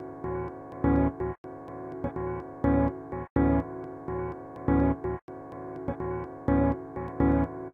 Sounds like Mujuice's piano.
edited, piano, processed, sampling